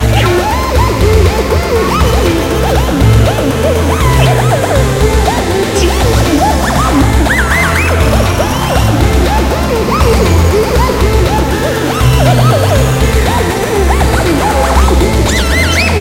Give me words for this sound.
spacejamloop3of3
psychadelic but slightly mellow and spaced-out alien music. Three loops in the key of C, 120 bpm
This is the bit at the end where the keyboardist has their crazy i mprov moment and blows up the equipment
sorry about that :D
weird, cool, mellow, galaxy, pyschadelic, game, music, space, loop, alien, video